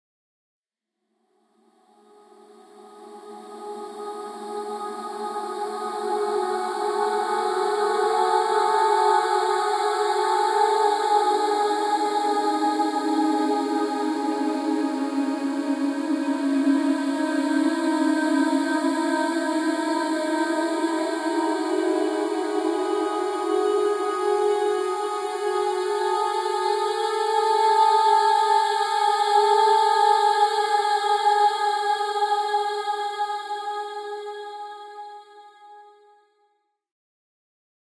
More blurred atmospheric sounds from a female vocal sample.